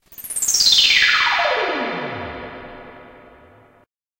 st-125bpm-f-Fx2-1
soundeffect, soundesign, synthes, synthesizer, effect, synth, fx, sci-fi, sweep